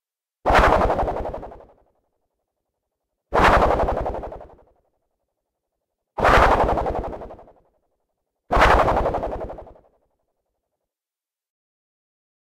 f Synth Wind Whoosh 3
Wind whooshes whoosh swoosh Gust
whoosh, whooshes, swoosh, Wind, Gust